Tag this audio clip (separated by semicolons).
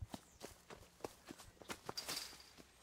Fence; Footsteps; Running